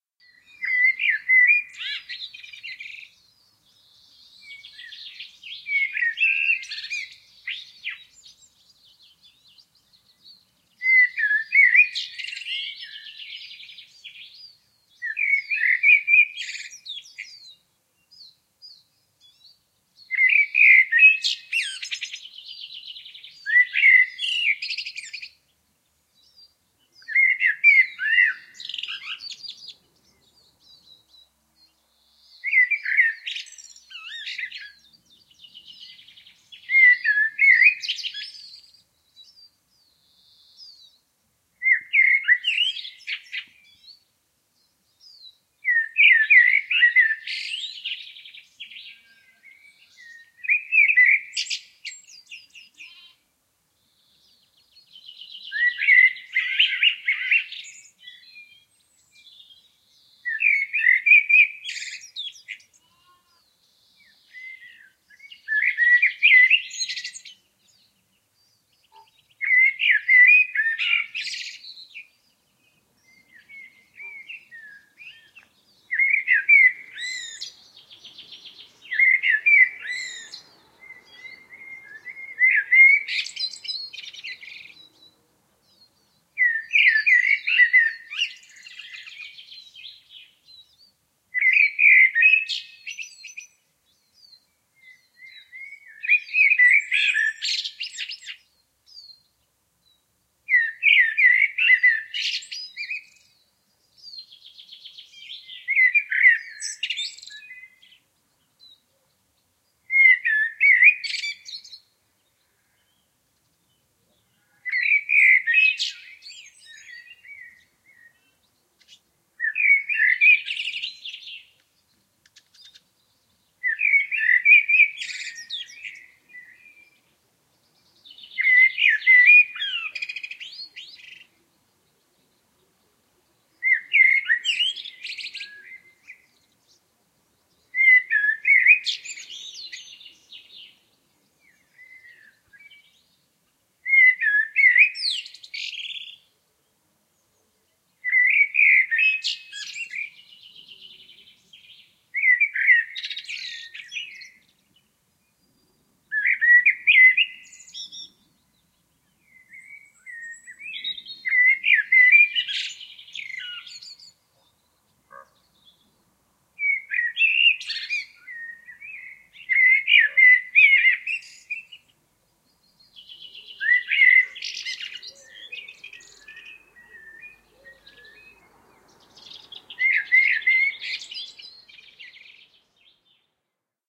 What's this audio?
A stereo field-recording of a Blackbird ( Turdus merula ). Rode NT-4 > FEL battery pre-amp > Zoom H2 line in.